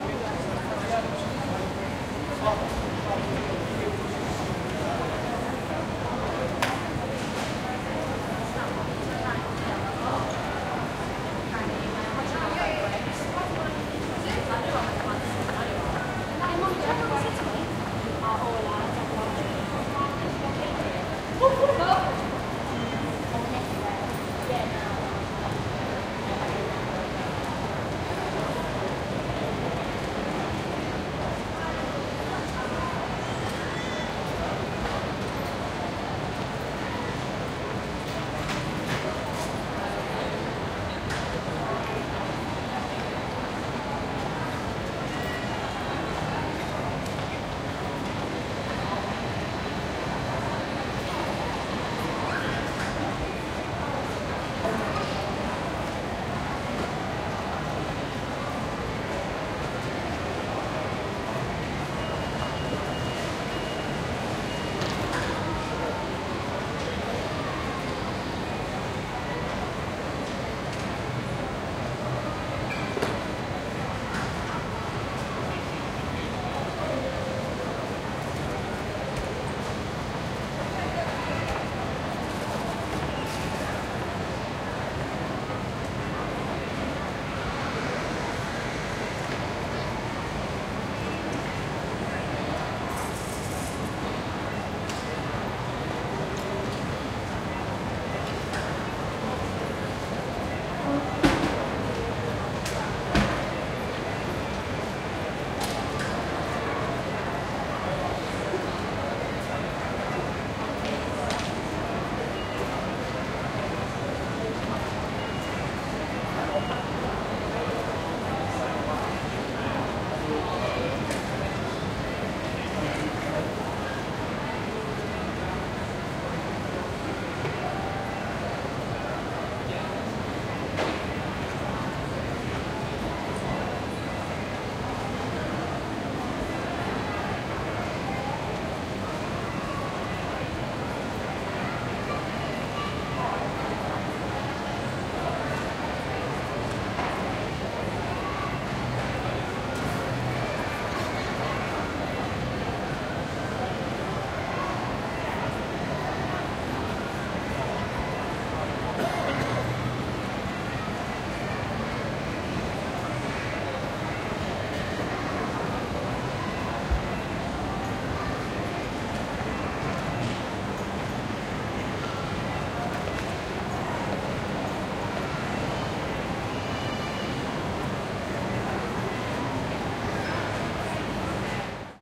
Shopping Mall - Stratford
An atmos recording of Westfield shopping mall in Stratford, East London on a weekday morning.
If you would like to support me please click below.
Buy Me A Coffee